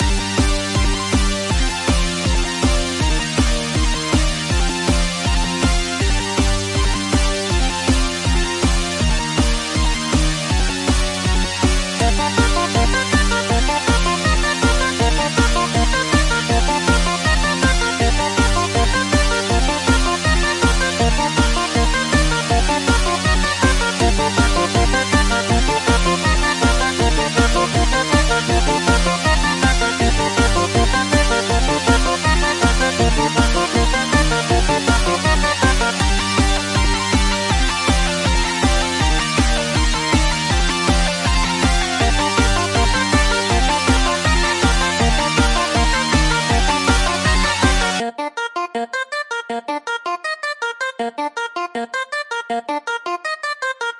This is a high energy loop I made in Ableton using a loop and fast paced drum track.
energy, High, loop, power-up